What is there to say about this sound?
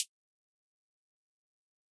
SwarajiwaTH - Closed Hihat
Closed hi-hat